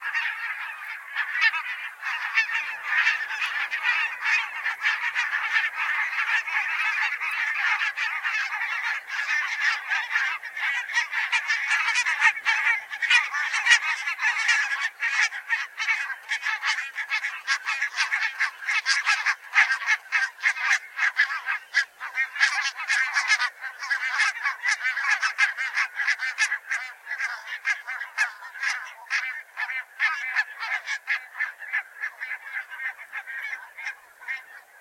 Barnacle Geese viv par
A large group of Barnacle geese flying close by, as they get close it is easy to pick out indivaiduals in the formation. One of my faves. at the moment. Olympus LS-5 mic Vivanco EM35 Parabolic
marsh, field-recording, Branta-leucopsis, goose, satl-marsh, skein, flight, birds, Barnacle